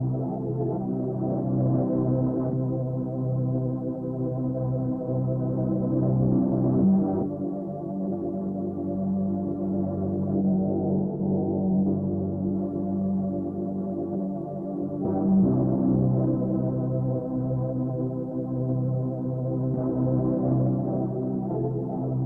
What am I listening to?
Choir Tape Chop (Full)
choir, chop, reel-to-reel, tape